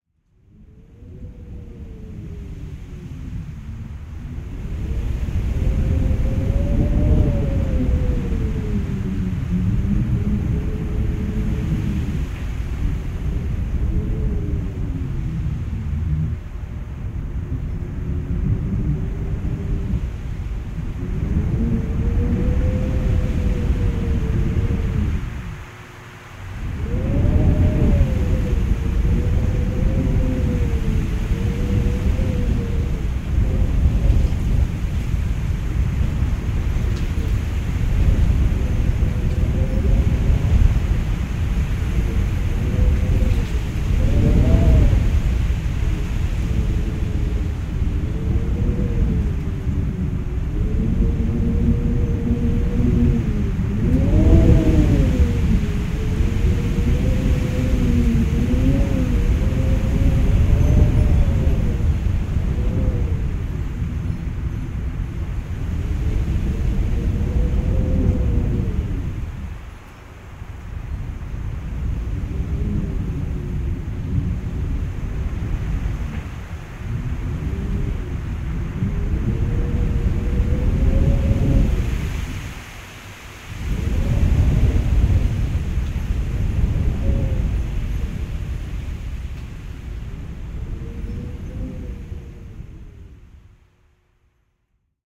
Howling Wind on Backdoor Porch
ambient, blowing, creepy, effects, field, howling, outdoors, recording, scary, sound, wind, windy